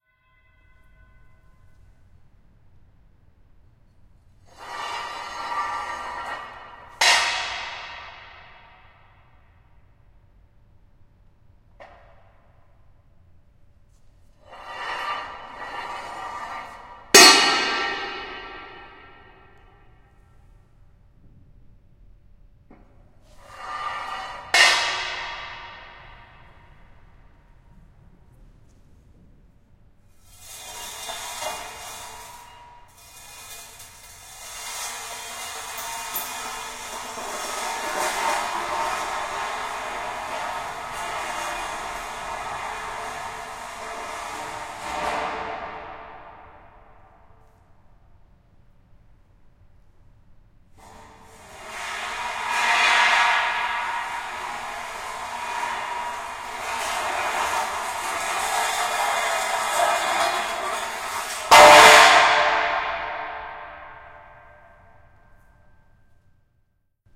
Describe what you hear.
metal drag&drop
drag & drop of heavy metal poles in an empty car park with more than 8 seconds of natural reverb.
recorded location :Théâtre-Auditorium de Poitiers-France
recorded with a couple of Neumann KM184 directly in protools via an M-box